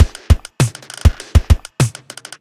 100 Bpm Loop 1
Some hh loop (:
loop, hip, beat, drum